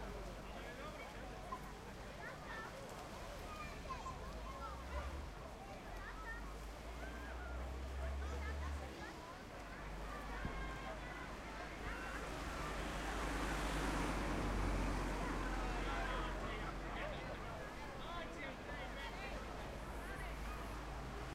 Lovran okretisteBus plaza cesta--
view from the road on small beach
beach, bus32, road